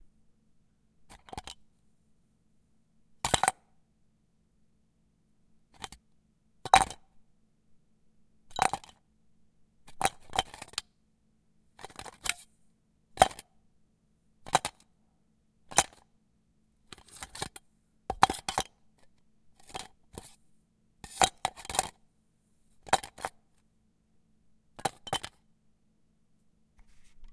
Dropping pencils into a soda can (with the top cut off)
Recorded with tascan DR40 (if anyone actually cares about that stuff)
can, drop, soda, aluminum, pencil